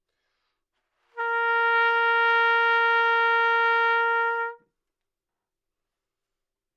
Trumpet - Asharp4
Part of the Good-sounds dataset of monophonic instrumental sounds.
instrument::trumpet
note::Asharp
octave::4
midi note::58
good-sounds-id::2865